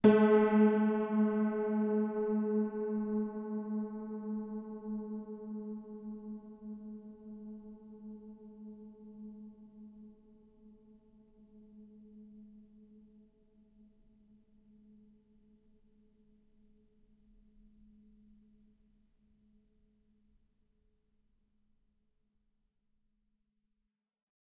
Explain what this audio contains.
Recording of a Gerard-Adam piano, which hasn't been tuned in at least 50 years! The sustained sound is very nice though to use in layered compositions and especially when played for example partly or backwards.Also very nice to build your own detuned piano sampler. NOTICE that for example Gis means G-sharp also known as G#.
piano, sustain, horror